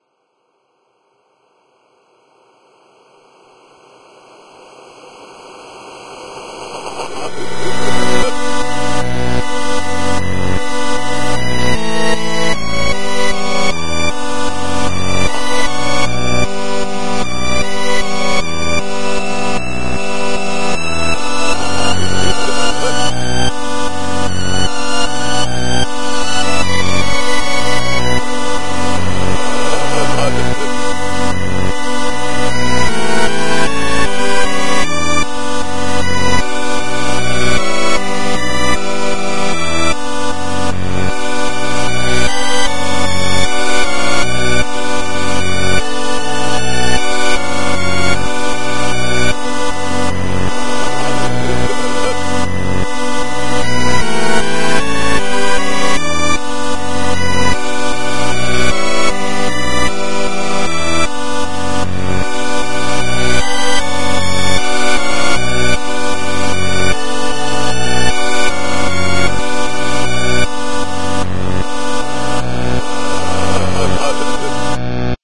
Halloween 8-bit in reverse